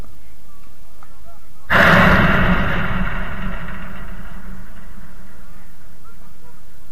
Crash Thud
A loud single crash in a quite a large room as if something heavy was droped. Slight echo.
Crash, boom, echo, hit, thud